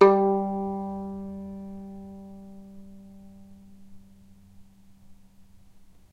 violin pizz non vib G2
violin pizzicato "non vibrato"
violin, pizzicato